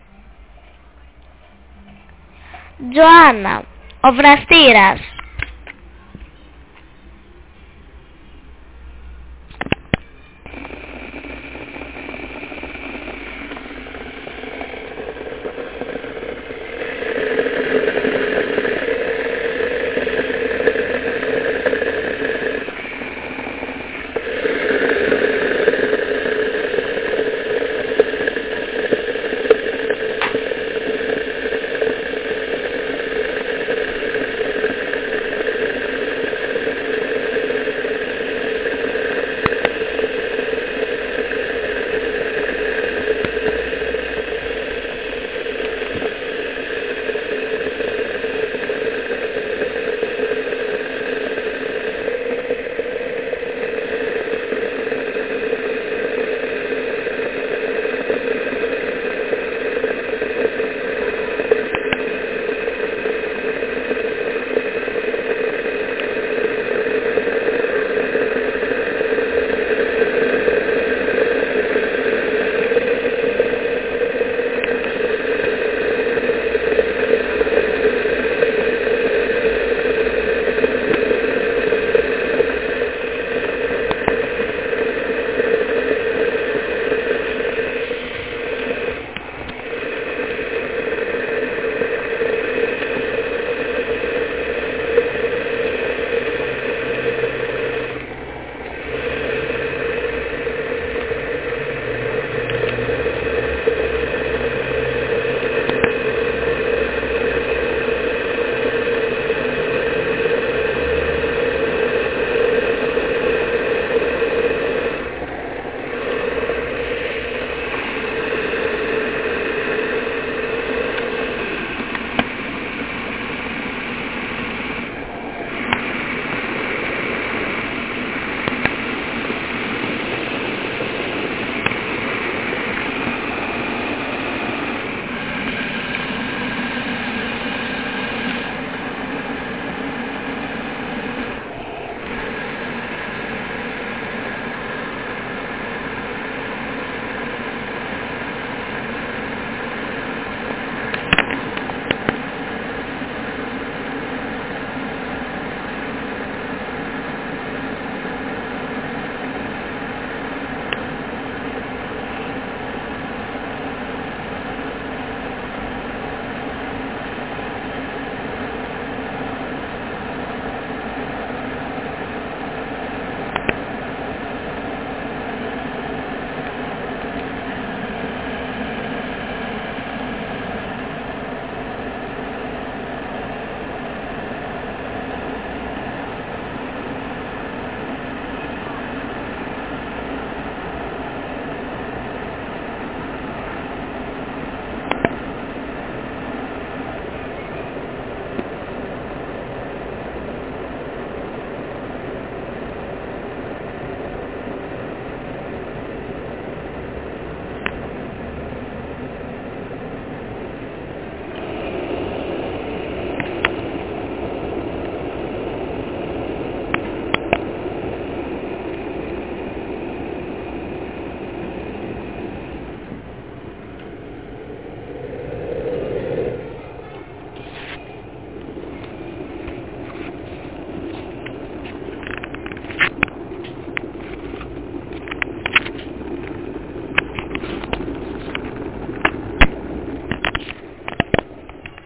Sonicsnaps-49GR-joana-vrastiras
Sonicsnaps made by the students at home.
49th-primary-school-of-Athens, Greece, boiler, sonicsnaps, water